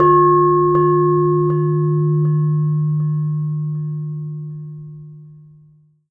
Bell Echo
Sythetic bell tone
bell, bells, church, dome, dong, gong, ring